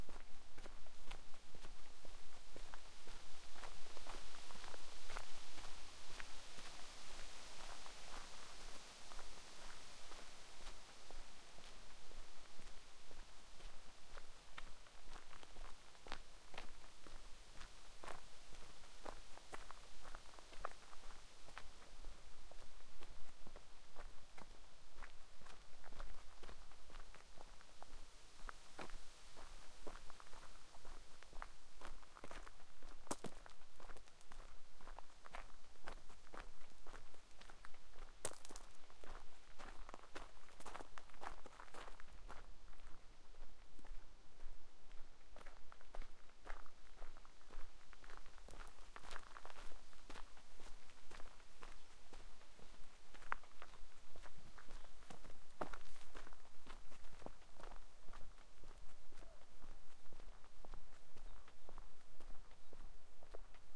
footsteps, rocky road
Recorded using tascam dr-100 mk2 near Sergiev-Posad.
Some forest and village sounds.
ambience, bird, field, field-recording, foot, footsteps, forest, humans, noise, road, step, village